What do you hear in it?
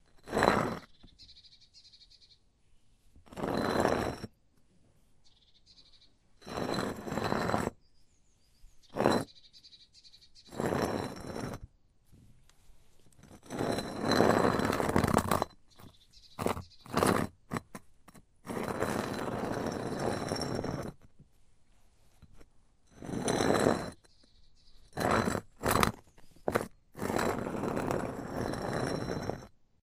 Heavy cinder block being dragged across asphalt a couple of times. I tried only sliding it over the ground when the bird was quiet, so you should be able to cut some sounds out and use.